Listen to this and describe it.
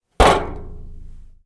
Metal Knock 1
A fist knocking on a piece of metal.
knock, fist, metal, hit